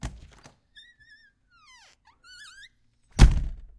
Open and close door squeaky hinges
Recorded with a Sony PCM-D50.
Opening and closing a door.
squeaky, squeak, open, hinges, close, door, wood